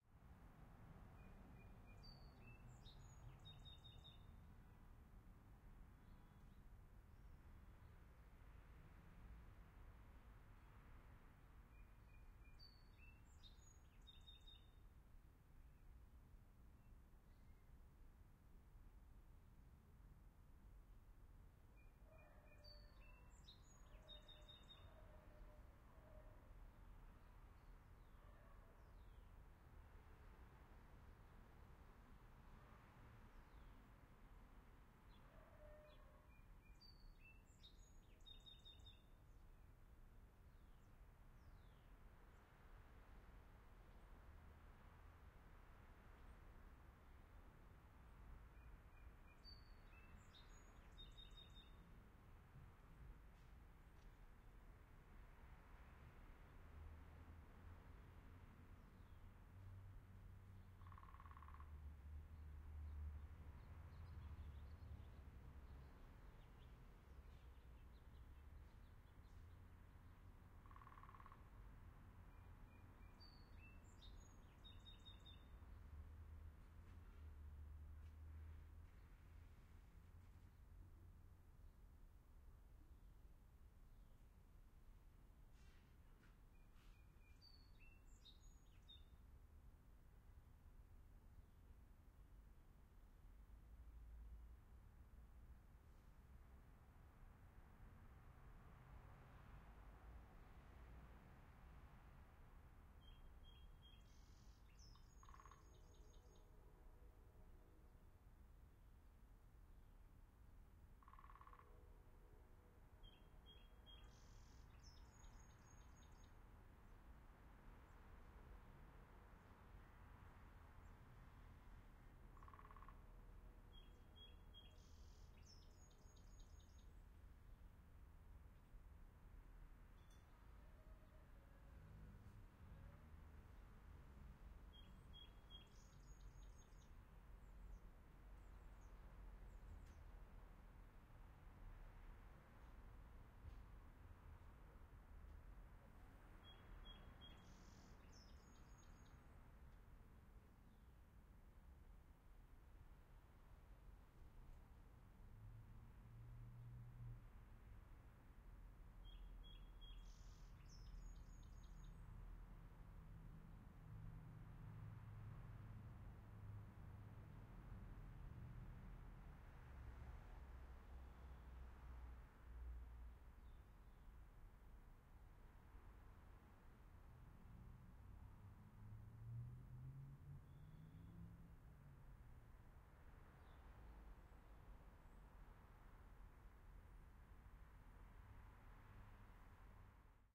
amb, ext, city backyard, birds, dog, cars, woodpecker, bformat
Ambisonic b-format recording recorded with the Coresound Tetramic in Pittsburgh, PA. *NOTE: you will need to decode this b-format ambisonic file with a plug-in such as the (free)SurroundZone2 which allows you to decode the file to a surround, stereo, or mono format. Also note that these are FuMa bformat files (and opposed to Ambix bformat).
ambiance, atmos, b-format